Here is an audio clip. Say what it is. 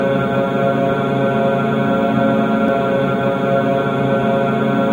Created using spectral freezing max patch. Some may have pops and clicks or audible looping but shouldn't be hard to fix.
Perpetual, Sound-Effect, Still, Soundscape